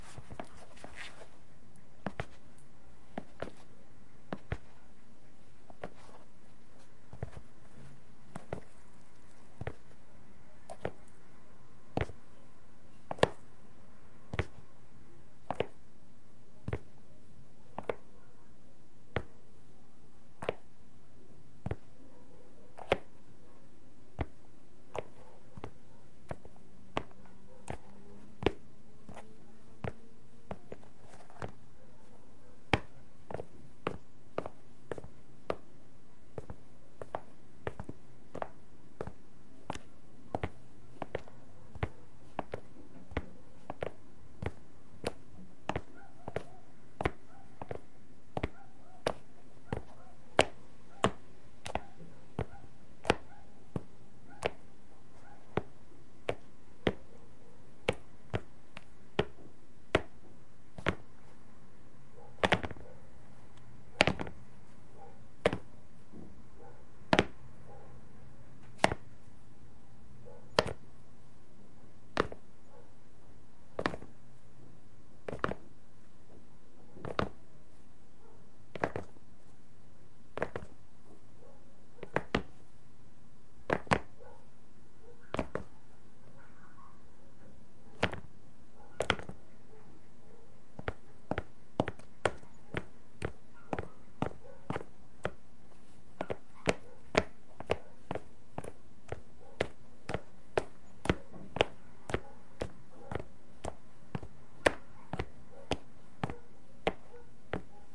footsteps-mockup03
Moving a pair of tennis shoes near the microphone, to simulate footsteps without actually walking. Tiled floor. Recorded with a Zoom H4n portable recorder.